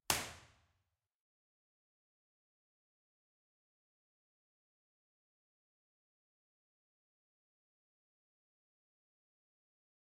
ESMUC Choir Hall IR at Rear Left-Corner
Impulse Response recorded at the Choir Hall from ESMUC, Barcelona at the Rear Left-Corner source position. This file is part of a collection of IR captured from the same mic placement but with the source at different points of the stage. This allows simulating true stereo panning by placing instruments on the stage by convolution instead of simply level differences.
The recording is in MS Stereo, with a omnidirectional and a figure-of-eight C414 microphones.
The channel number 1 is the Side and the number 2 is the Mid.
To perform the convolution, an LR decomposition is needed:
L = channel 2 + channel 1
R = channel 2 - channel 1
reverb, impulse-response